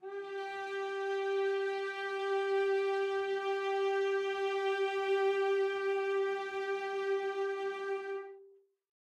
One-shot from Versilian Studios Chamber Orchestra 2: Community Edition sampling project.
Instrument family: Strings
Instrument: Cello Section
Articulation: vibrato sustain
Note: F#4
Midi note: 67
Midi velocity (center): 63
Microphone: 2x Rode NT1-A spaced pair, 1 Royer R-101.
Performer: Cristobal Cruz-Garcia, Addy Harris, Parker Ousley